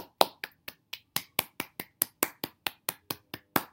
This sound represents a clap. When we want to show that we are proud of an action or a speech, we usually applaud. So I tried to transmit this action.